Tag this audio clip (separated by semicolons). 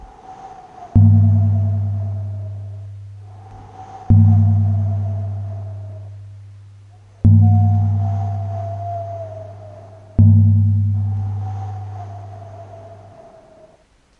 Night; bells; calm; hooting; owl; silent; wind